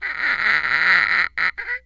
scratch.long.13
friction, instrument